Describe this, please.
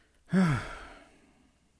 A single sigh